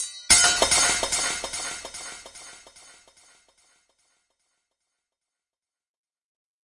SPOON FALL echo
spoon falling onto the working top
crash, crashing, falling, kitchen, noise, spoon